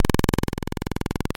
APC-LowClicker1
noise, Lo-Fi, glitch, APC, drone, diy, Atari-Punk-Console